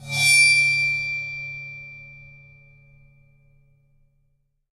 Bowed Mini China 01
Cymbal recorded with Rode NT 5 Mics in the Studio. Editing with REAPER.
beat, bell, bowed, china, crash, cymbal, cymbals, drum, drums, groove, hit, meinl, metal, one-shot, paiste, percussion, ride, sabian, sample, sound, special, splash, zildjian